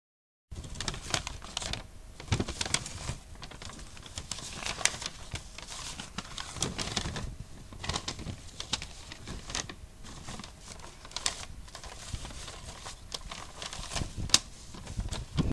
Opening folded papers
handing of paper,pages. Unfolding papers, pages.
book, newspaper, turning, pages, turn, papers, paper, page, unfolding, reading